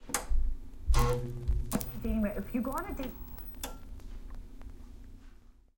TV, CRT, turning on off, static, click (2012)
Television, click and static turning on an off of CRT TV. Sony M10. 2012.
click, crt, static, television, TV